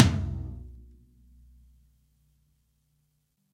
Low Tom Of God Wet 014
pack, drum, kit, drumset